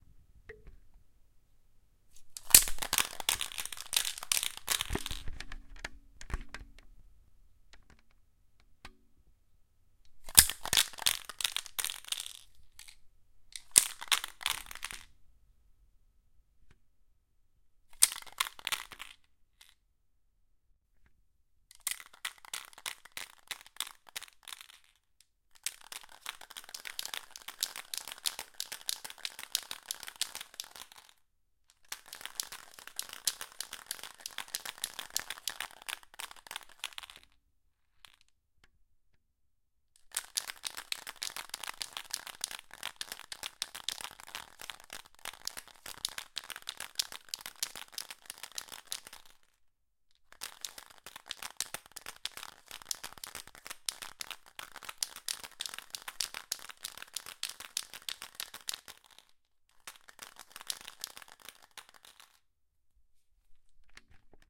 SPRAY NOISE
Sound of the shake of a spray can recorded with a Tascam DR 40
SPRAY, AEROSOL, CAN